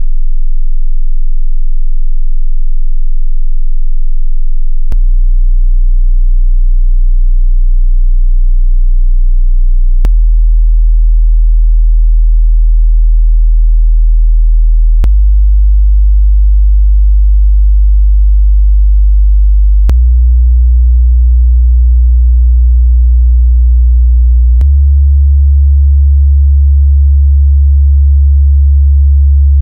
Bass Sine Sweep 20-70Hz
A Sine Wave Sweep that jumps 20Hz up to 70Hz made using Audacity
Originally made to test out my cars subwoofer
audacity, bass, bass-test, end, low, low-end, modulation, sine, sine-wave, sub-bass, subwoofer-test, sweep, synth